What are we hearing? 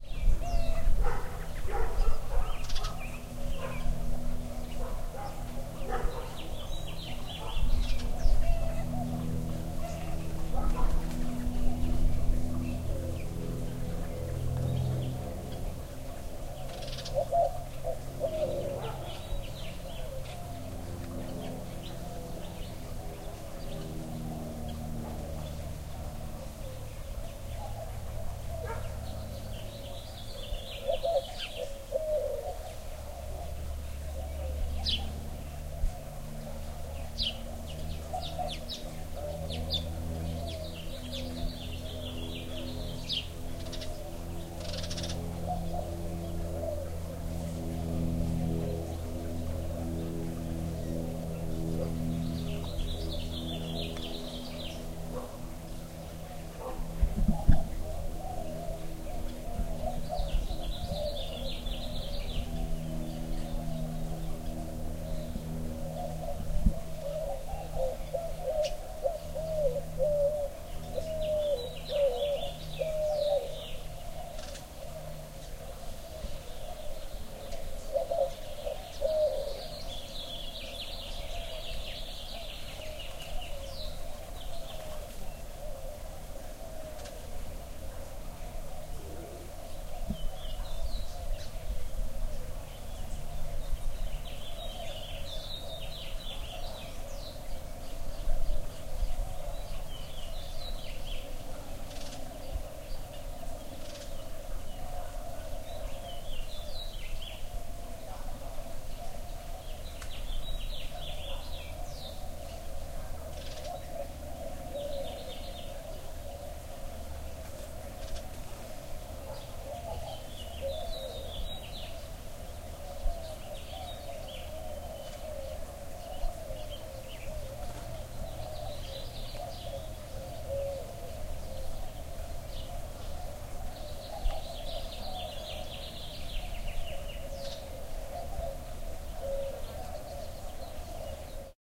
Las Cruces morning doves
This is a field recording from my friend Walker's yard in Las Cruces, NM that I made on a band tour in May 2006. There is a horse running by on the road at one point and some dogs in the background, but the morning doves stand out the most and sound really beautiful at points.
AudioTechnica AT22 > Marantz PMD660 > edited in Wavelab
field-recording, morning-doves, las-cruces, birds